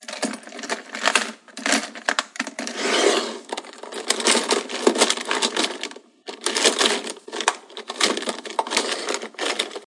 jose garcia - foley - pencil holder
Rummaging through a pencil holder
mus152, pen, holder, pencil